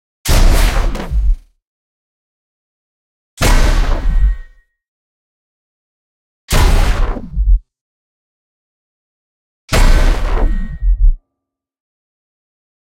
big metallic robot footsteps
Big robot footsteps, designed using several metal sounds, lfe sounds & Operator FM synth in Ableton
big, foley, footstep, gamesound, lfe, mechanic, metal-hit, robot, sfx, sound-design, sounddesign